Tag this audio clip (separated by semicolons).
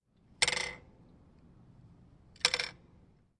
aip09; bicycle; kick; kickstand; stand